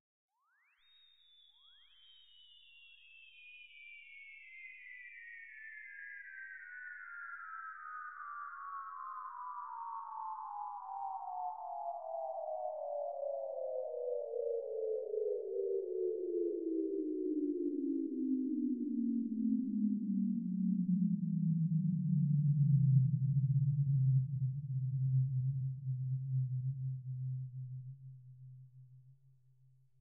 synth space ship landing
landing, ship, space, synth